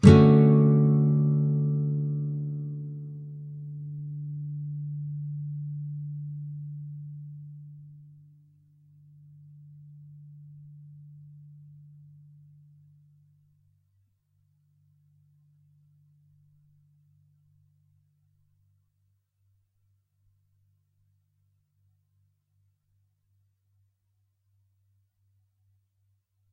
Standard open D Major 7th chord. The same as D Major except the B (2nd) string which has the 2nd fret held. Down strum. If any of these samples have any errors or faults, please tell me.
7th, acoustic, chords, clean, nylon-guitar, open-chords